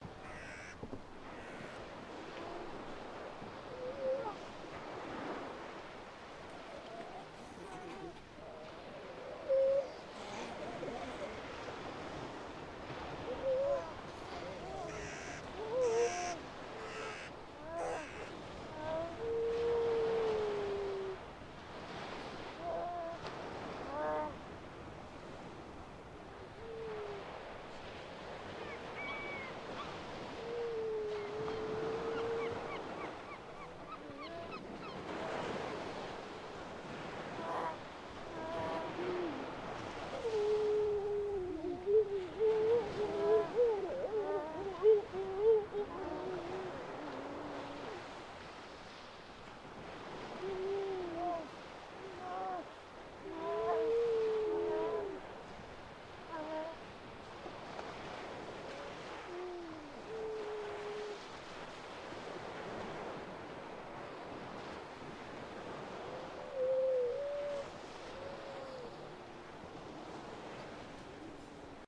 Heligoland Beach
Beach scene on Heligoland in the german north sea: Distant waves, some seagulls and crows as well as some grey seals with their young. Recorded with a Sennheiser MKE600 and a Rode Blimp with a Dead Wombat using a Tascam DR100 MK2
Waves, Birds, Greay, Seal, Heuler, Kegelrobbe, Heligoland, Helgoland